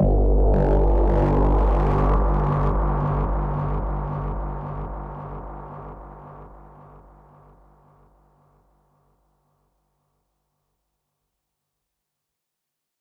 making a bass and putting some delay and reverb in fl studio :D
overwhelming, nightmare, sinister, terrifying, spectre, eerie, fear, haunted, macabre, phantom, creepy, spooky, echo, chill